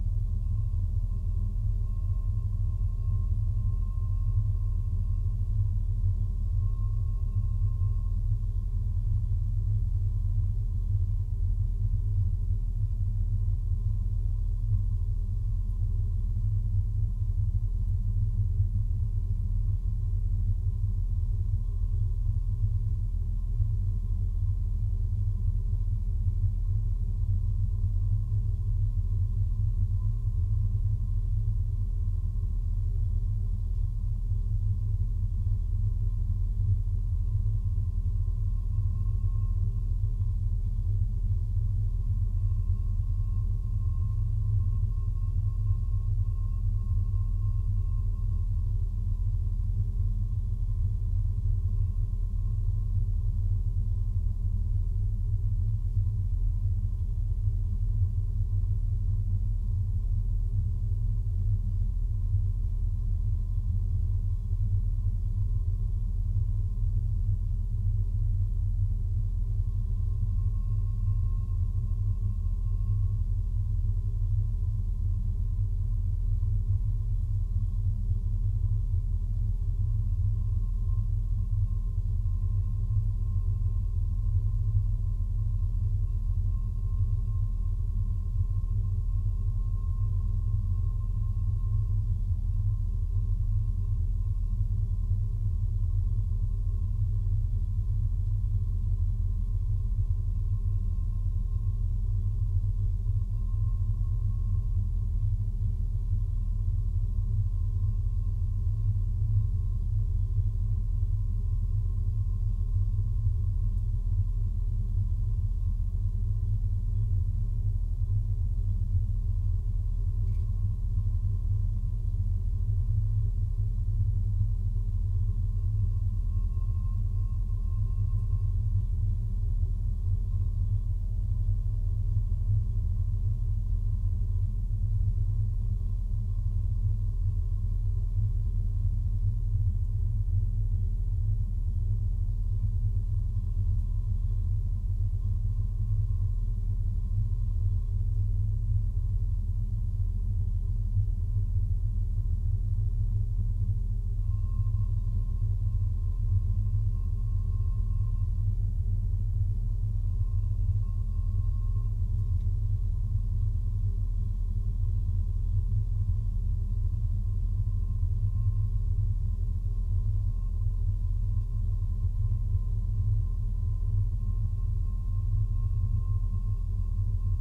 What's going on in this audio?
strange ventilation
Ventilation in a small service room, recorded inside a washing machine.
EM172-> ULN-2
bass, drone, resonance, room-tone, ventilation, washing-machine